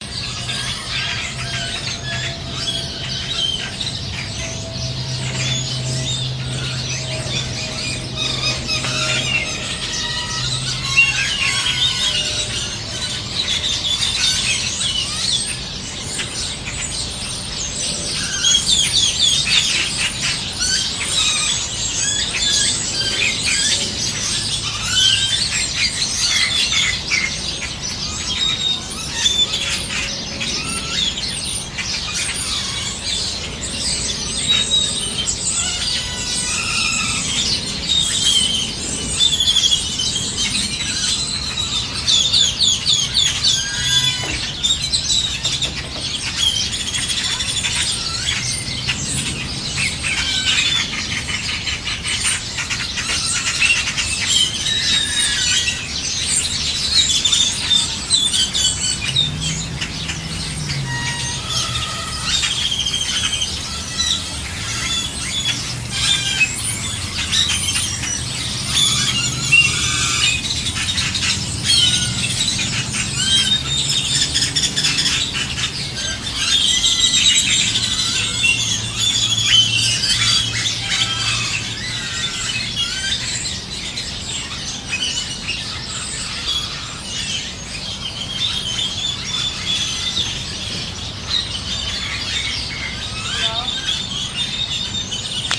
Background/distant sound of airplane flying overhead, car engines, woman answering her cell phone "hello" toward the end of the file, thud of door closing midway through file. (Some of the birds may be European Starlings plus others, but I am not sure.)
airplane, bird, field-recording, nature